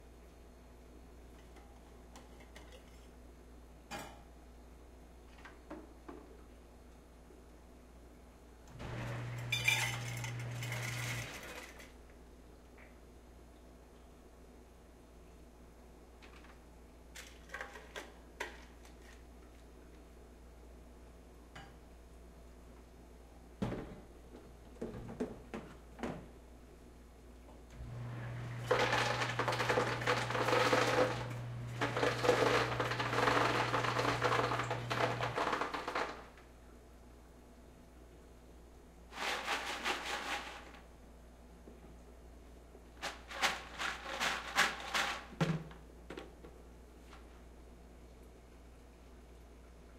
Motel 6 Ice Maker Machine
We went to Motel 6 in Chedar City and there was one sound that bothered me the whole night long and it was a vending machine right next door to our room and people came all night long to get drinks and Ice out of the ice crushing machine!
On one hand, yes I couldn't sleep but on the other hand is it a great sound effect.
Device: Zoom H6
POWER, MOTOR, vending, machinery, machine, COMPRESSOR, hum, icemaker, mechanical, cubes, ice, Sounds